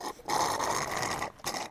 sound 17 - orange juice
Sound of an orange juice brick being finished.
Taken with a Zoom H recorder, near the brick.
Taken in the UPF computer rooms building.